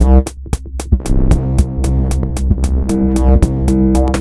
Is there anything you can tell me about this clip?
It is a one measure 4/4 drumloop at 114 bpm, created with the Waldorf Attack VSTi within Cubase SX.
The loop has a low tempo more experimental electro feel with some
expressive bass sounds, most of them having a pitch of C. The drumloop
for loops 10 till 19 is always the same. The variation is in the bass.
Loops 18 and 19 contain the drums only, where 09 is the most stripped
version of the two. Mastering (EQ, Stereo Enhancer, Multi-Band expand/compress/limit, dither, fades at start and/or end) done within Wavelab.